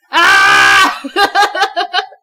I was playing with my rats, and sometimes ezio likes to grab my lip and pull me closer to him selfishly to give me kisses. it kinda hurts XD